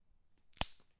Snapping a small branch unknown wood.